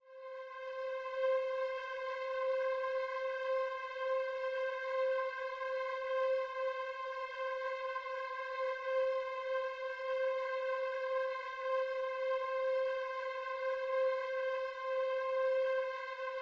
Yet another sound synthesized for use in the first collab dare.
My parter wanted to use some strings sounds and shared a sample with me as an example. There were other sounds to play at the same time so I designed these thin strings in Reason's Maelstrom synth (using a hign pass filter for the thin sound) so they would sit well in the mix without the need to EQ.
collab-1, Maelstrom, pad, Reason, strings, synth